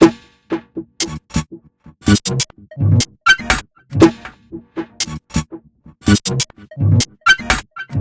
Massive Loop -31
Another weird experimental drumloop with a slight melodic touch created with Massive within Reaktor from Native Instruments. Mastered with several plugins within Wavelab.
loop, experimental